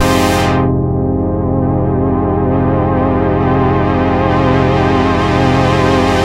Self created patch on my Korg Poly 800 MKI (inversed keys, as if that would matter ;))